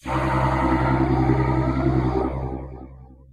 generated using a speech synthesis program-- layering several vowel sounds and adding a low base noise in the same key. applied mid and low-EQ boost, reverb and heavy phaser.
voice, droning, ambient, robot, choir, chorus, drone, sci-fi